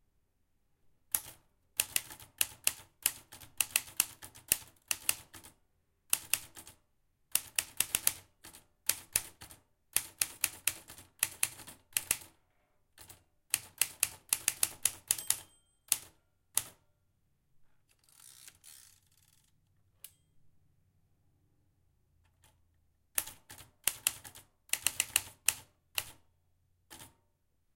Typewriter Typing
Typing on a Smith Corona Typewriter.
Typewriter, Typing, Typewriter-typing